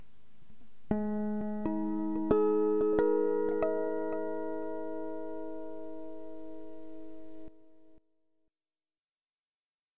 Pizzecato Aadd9 overtone delay
This is Pizzecato Aadd9 overtone with added delay effect